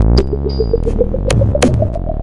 tweaknology rising-up01
made with black retangle (Reaktor ensemble) this is part of a pack of short cuts from the same session
noise, glitch, lab, mutant, sci-fi, electro, hi-tech, soundeffect, robot, computer, soundesign, analog, transformers, effect, digital, cyborg, fx